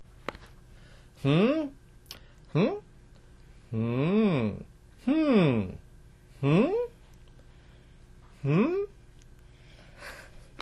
hmmm question 2
A man saying "hmm?" a few different ways
man, hm, question, hmmm, hmm, male